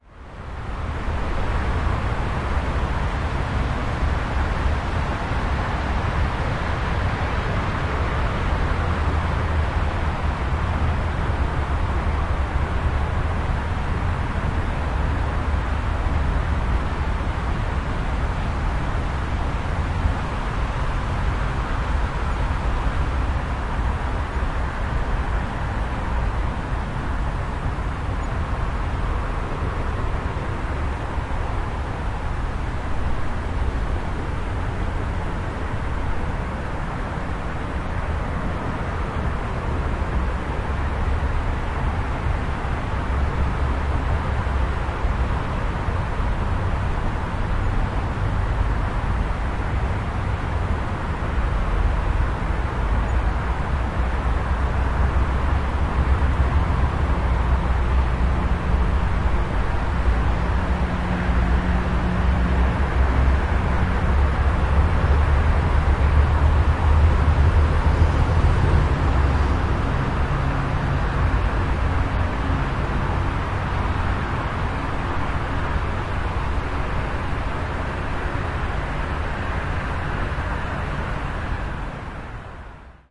0283 Background traffic
Traffic from a road in the night.
20120608
traffic, korea, seoul, field-recording